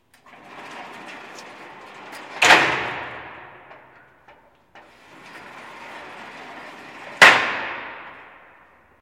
Sounds recorded from a prision.